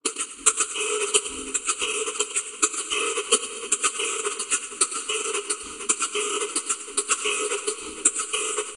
Guiro Rhythm Loop Remix 2
An instrument named Guiro on a Cuban rhythm mixed with different effect, like reverberation.
PS: This sound come from a rhythm played by a percussionist (not a drum-machine pattern).
brazil, cuba, salsa